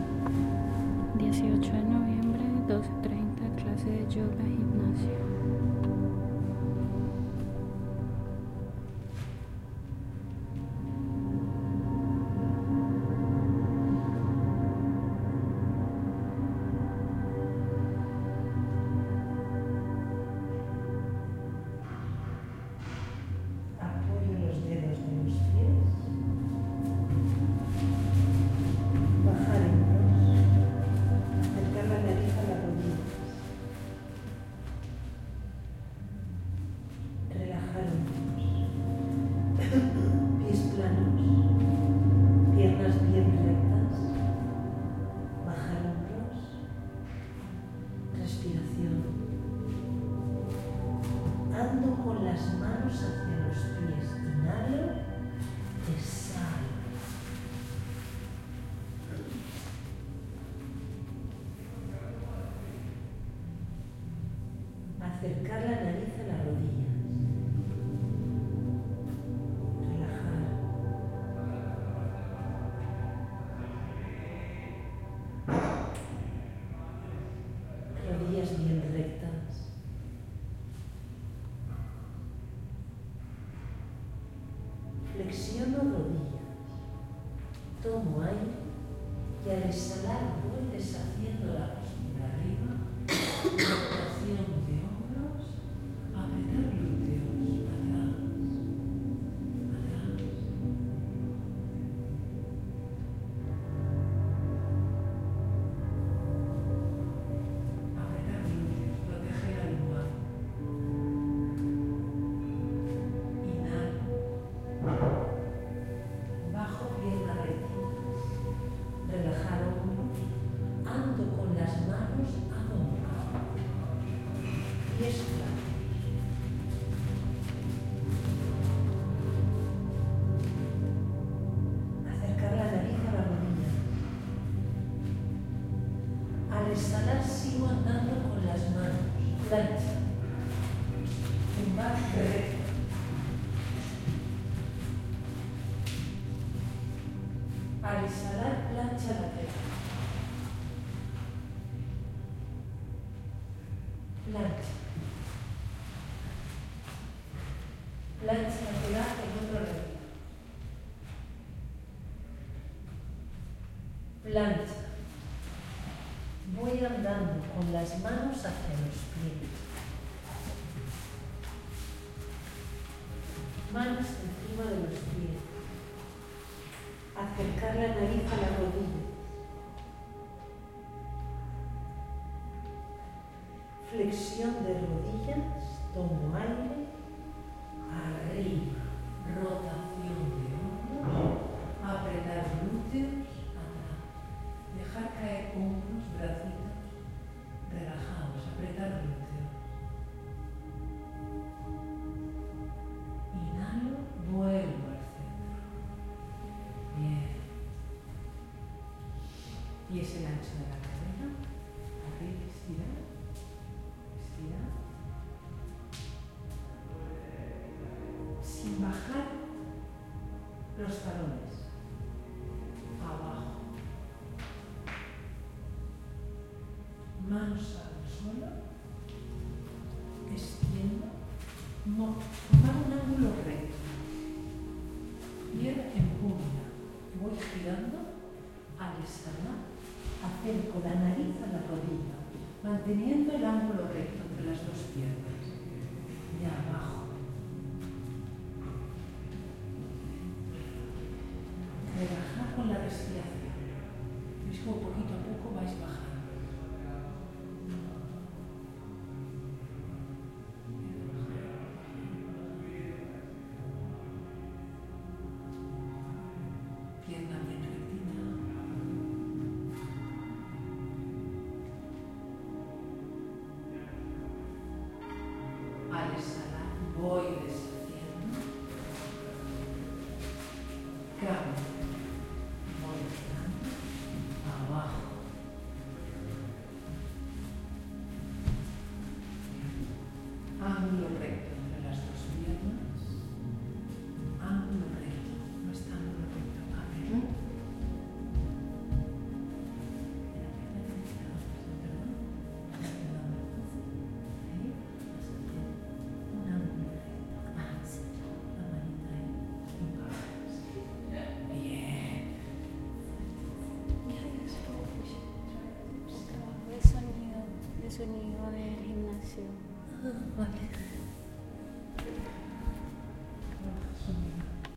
Sound of a yoga lesson in a small gym
chill-out, gym, relax, yoga